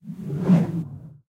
A stereo field-recording of a swoosh sound created by swinging a 3.5m length of braided climbing rope. Rode NT-4 > FEL battery pre-amp > Zoom H2 line-in.
dry, whoosh, swoosh